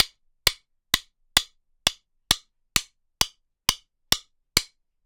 A pair of drumsticks being hit together a series of times in rapid succession